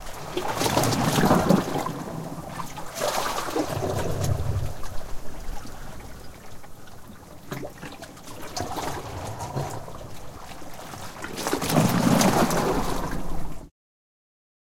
Recording of waves in gully. Tascam DR-100